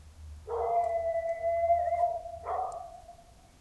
Dog howl 03
A dog howling in the distance at night. (Slightly spooky!)